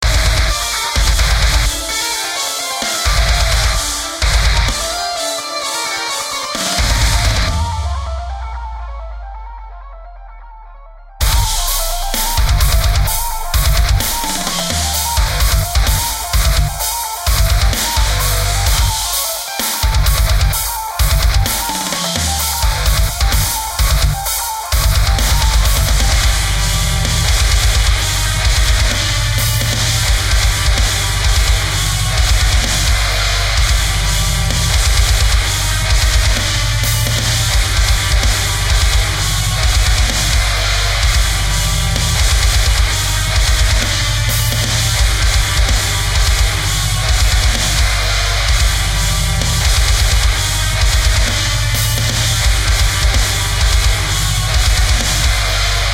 Deathcore Drum/Mix Sample with Guitars
Fully mixed parts to a clip of a track.
bass, deathcore, deathmetal, drums, metal, orchestra